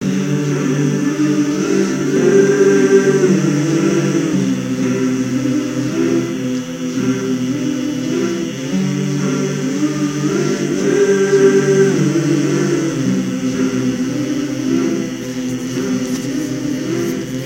9TTER Vocals2
A collection of samples/loops intended for personal and commercial music production. All compositions where written and performed by Chris S. Bacon on Home Sick Recordings. Take things, shake things, make things.
rock, vocal-loops, piano, percussion, looping, sounds, Folk, loops, acoustic-guitar, original-music, voice, Indie-folk, melody, drums, drum-beat, synth, samples, guitar, beat, bass, harmony, loop, whistle, free, acapella, indie